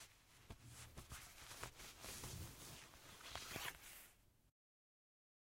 Rummaging in a pocket
Rummaging through a pocket and pulling out a folded piece of paper.
Recorded with AT2035.